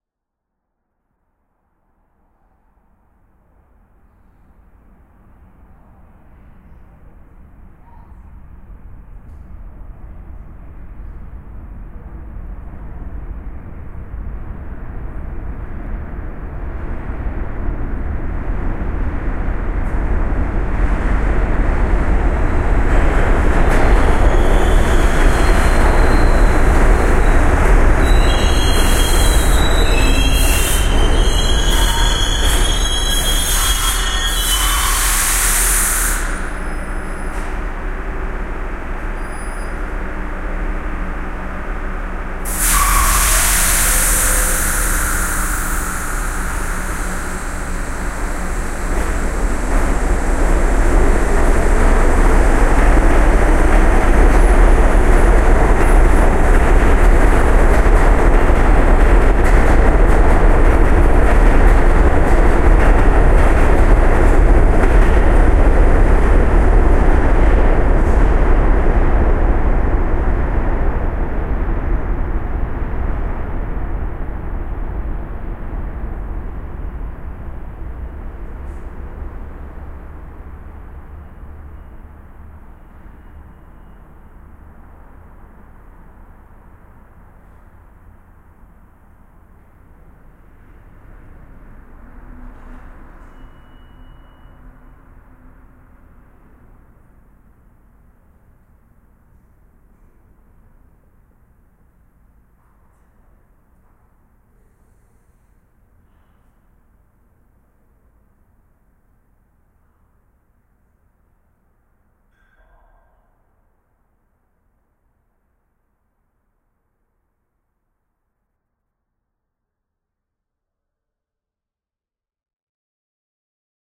Earth Subway Train Pass
Inside the earth, flows the passangers like blood cells through the veins of our body. Late at night I recorded a subway train stopping and starting again.Sony Minidisk, Logic 7 pro EQ and SubBass